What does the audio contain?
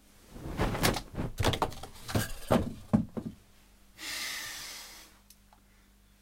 Here you go, some free stuff to spice up your vids.
I accidentally hit my picture frame next to my bed with the blanket causing it to fall between the bed and the wall.
MIC: Samsung C01U pro.